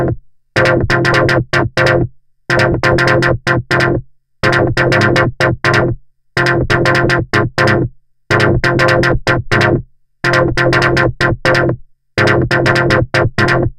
Some recordings using my modular synth (with Mungo W0 in the core)
Analog, Mungo, Modular, Synth, W0